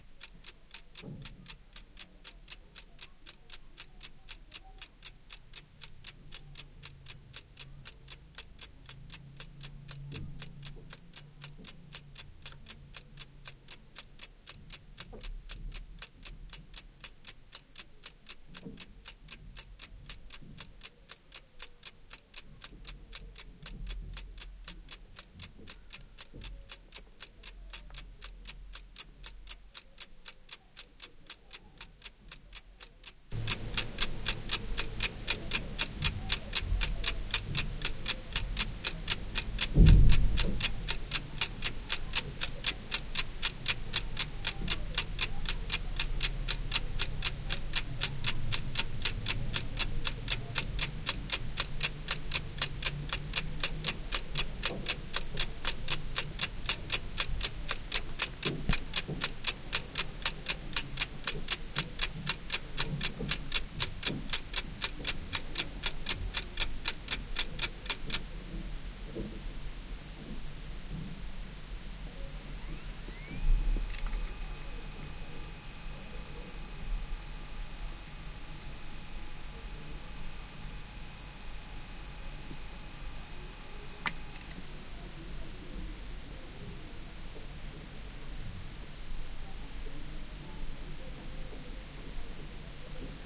gear.test.inside
Clock tic-tocs recorded inside the quietest place at my home, a walk-in wardrobe. Soundman-OKM mics, FEL BMA1 preamp, iRiver H120 recorder. Note: the first third of this recording has the preamp set at half-gain; in the second part the preamp is a tad below maximum output. Finally, during the third part the preamp was also slightly below maximum, but there were no sound sources - except for the hard disk of the recorder, which can be heard spinning, and muffled sounds that crossed the walls. The (rockboxed) iRiver was set to provide no gain (0) throughout. By request of Inchadney. WARNING: lots of hiss
soundman-okm, gear, iriver, preamp, testing, hiss, fel, noise